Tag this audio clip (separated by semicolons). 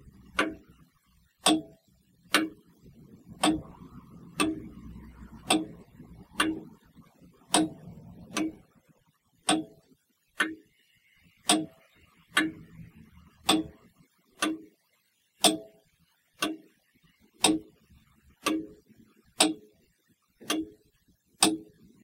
Clock; Grandfather-Clock; Tick